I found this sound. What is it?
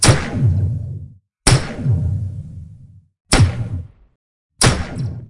Laser Pulse Rifle
A mix up of some Sci-Fi warping sounds with a percussive kick of some remixed firecrackers to give it the punch it needed.